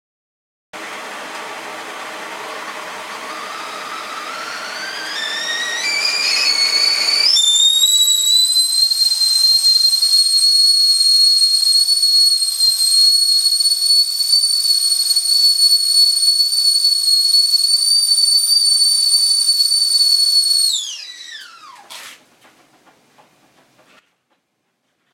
kettle whistles as water boils
A kettle whistles as the water comes to a boil.